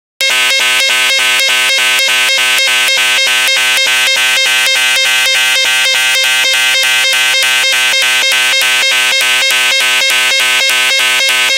archi scifi alarm hair raising2 202bpm
Science fiction alarm for being targeted by a weapon. Synthesized with KarmaFX.
alarm, danger, fictional, indication, indicator, science-fiction, scifi, synthesized, synthesizer, tracked, tracking, warning